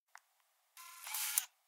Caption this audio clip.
sl50 power off
Samsung SL50 powering off